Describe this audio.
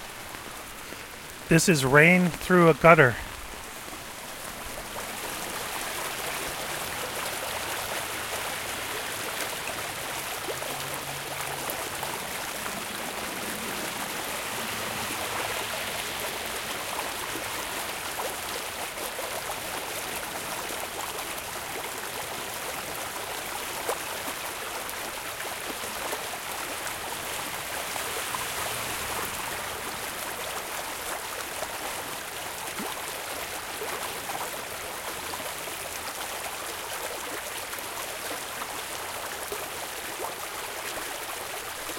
FIELD LA Rain Park Water in gutter 01
Rain recorded in Los Angeles, Spring 2019.
Water running through a gutter in Griffith Park.
Los-Angeles, water, field-recording, rain, AudioDramaHub